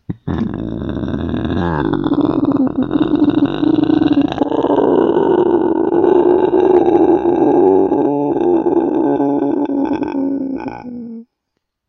Inverse Growling
This sound is created by stretching your vocal cords in the back of your throat while doing an inverse hum.
First half my mouth was closed,
Second half I opened my mouth.
This is just a practice on loosening my throat. Providing me with more pitch options for voice acting.
I figure someone could use this for a monster or creature sound.